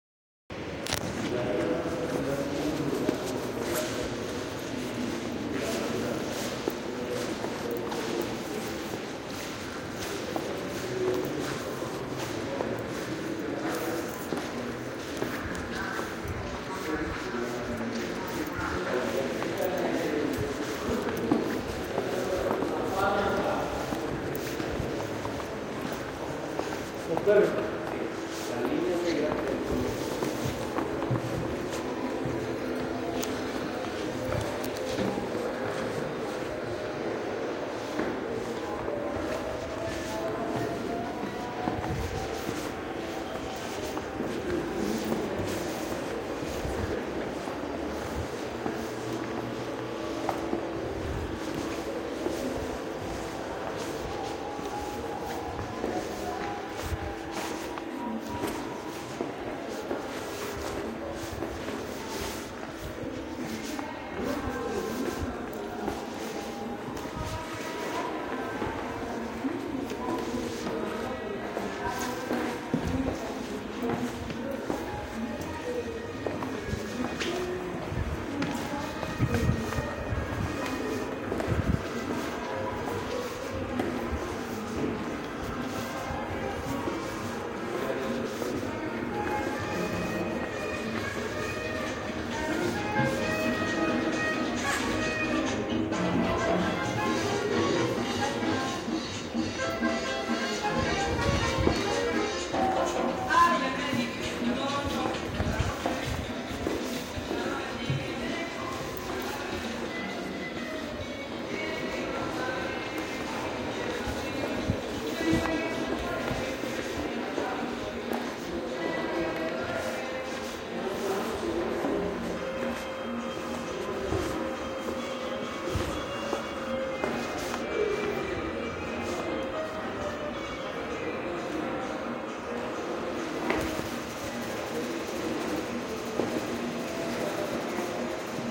Museo Nacional-Toma combinada a dos mic-Pasillo3-Evelyn,David-10 03 2020
Toma combinada en el pasillo 3 del museo nacional en Bogotá. Para la realización de esta toma se utilizaron los teléfonos celulares Samsung Galaxy A10, Kalley black pro. este trabajo fue realizado dentro del marco de la clase de patrimonio del programa de música de la Universidad Antonio Nariño 2020 I. este grupo esta conformado por los estudiantes David Cardenas,Evelyn Robayo, Daniel Castro, Natalia Niño y el profesor David Carrascal.
artesonoro, paisajesonoro, soundscape